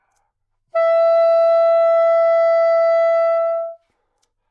Part of the Good-sounds dataset of monophonic instrumental sounds.
instrument::sax_soprano
note::E
octave::5
midi note::64
good-sounds-id::5592